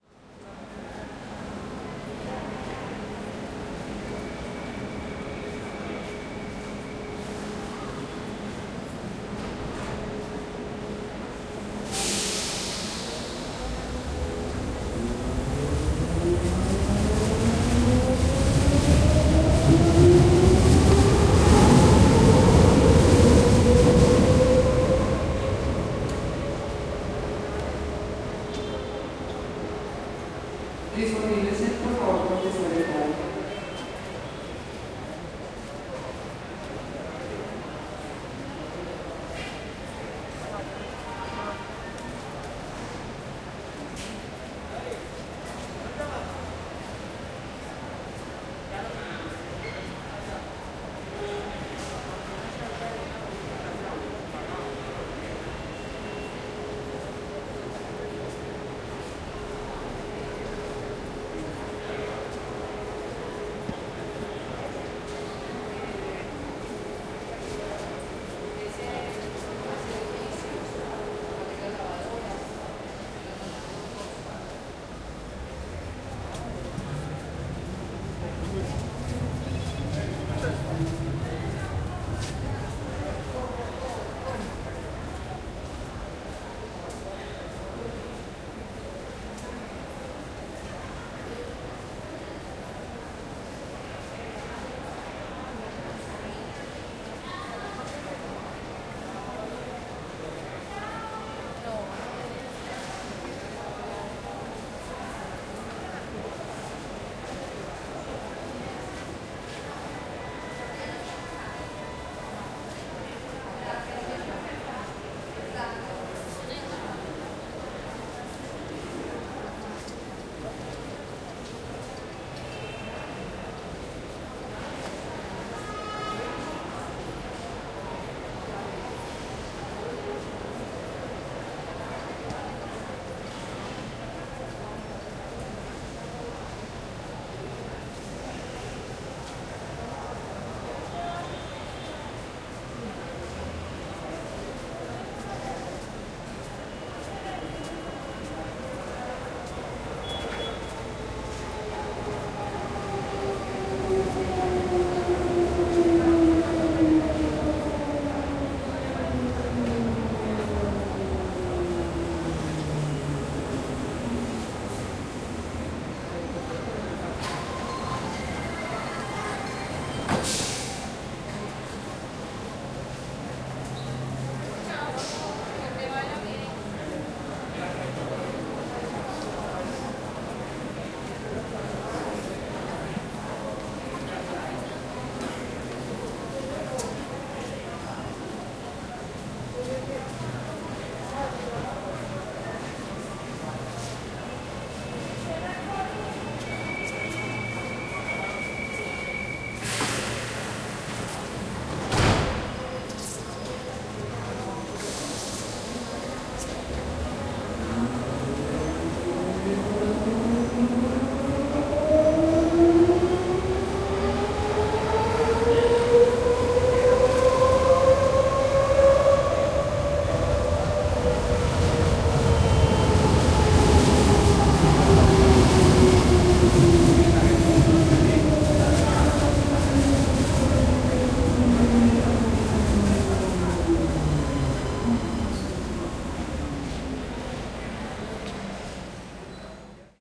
Medellin Metro Walla Calm FormatA
Walla from a Medellin's metro station in a calm day Ambisonics Format A. Recorded with Zoom H3-VR.
Ambience, Waiting-Subway, Subway, Walla, Metro-Calm, Crowd